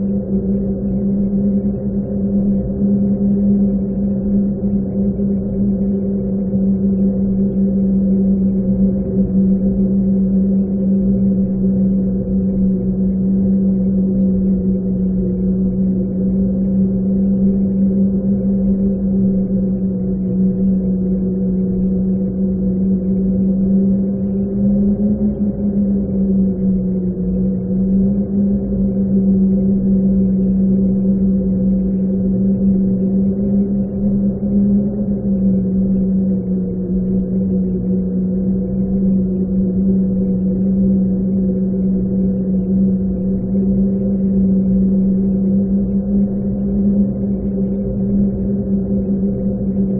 Howling Wind Loop
Some door frames apparently are intended as sound machines. Seamless/gapless/looping edited version.
Recorded with a Zoom H2. Edited with Audacity.
Plaintext:
HTML:
atmospheric, howling, ghostly, haunted, windy, blow, thrill, sinister, thriller, blowing, scary, terror, atmo, spooky, norctural, background-sound, wind, thief, phantom, horror, cave, ambient, ghost, creepy